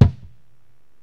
A recording im not too happy with of my kick with different muffles. but its what i got at the moment